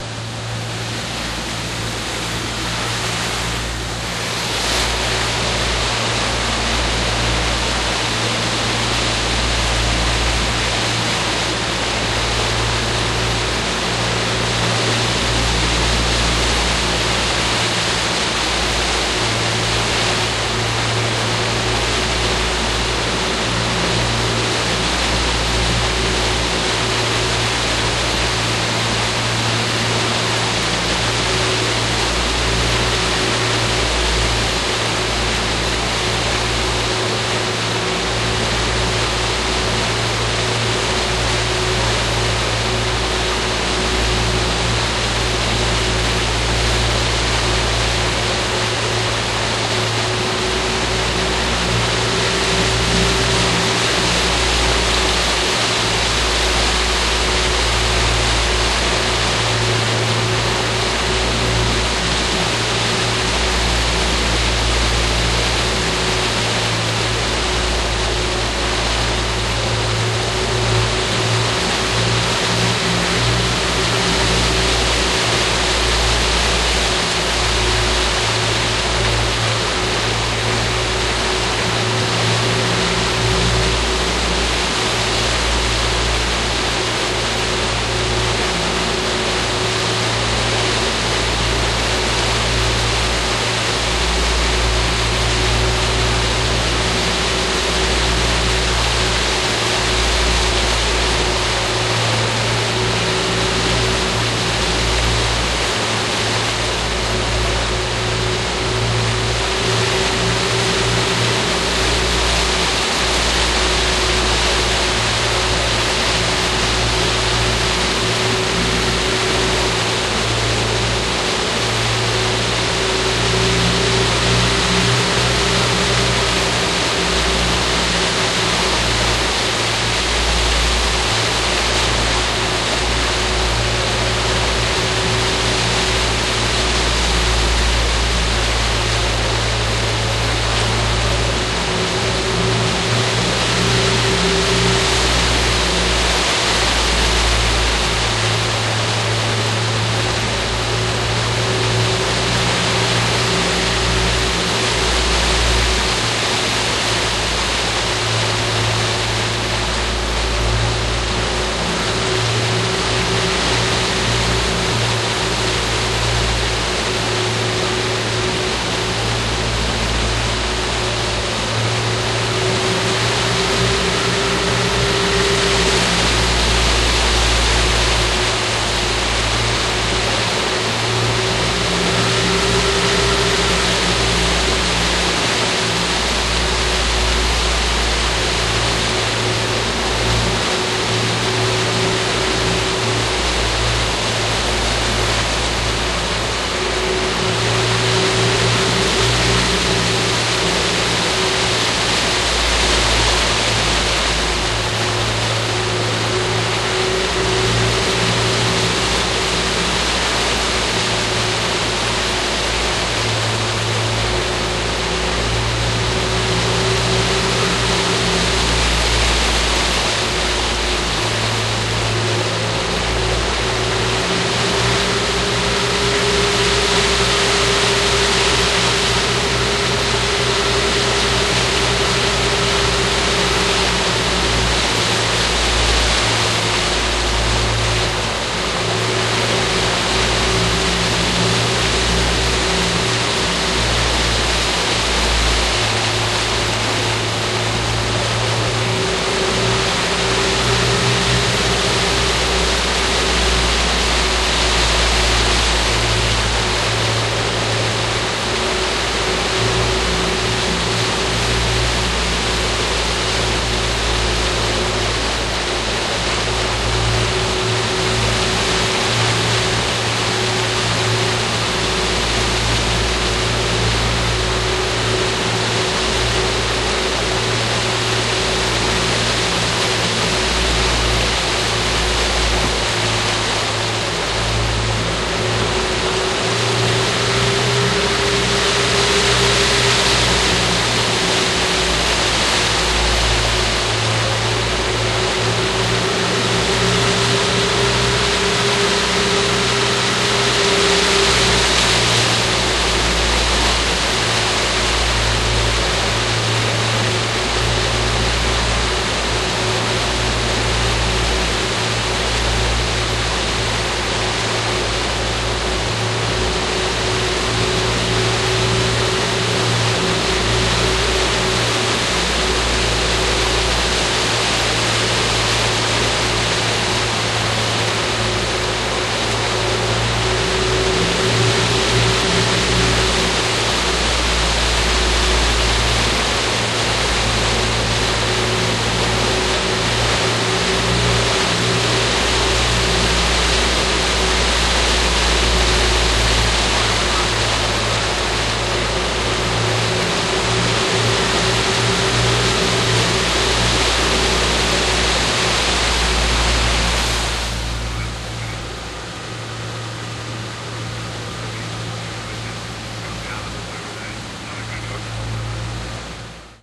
Outboard Motors
This is the sound of two 4-stroke outboard engines on a dolphin tourist boat in Cardigan Bay, Wales.
Boat, Cardigan-bay